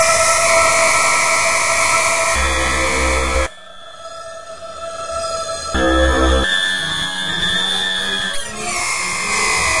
another busy 2-bar loop with lots of changeups in dynamic and pitch; done in Native Instruments Reaktor and Adobe Audition
2-bar, busy, electronic, industrial, loop, noise, noisy, panning, pitched, sound-design, sustained